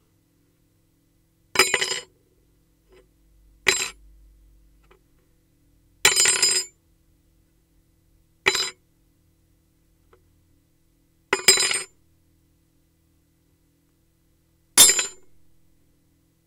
spoon drop1
A teaspoon drops onto a wooden counter top. Several takes.
hit, spoon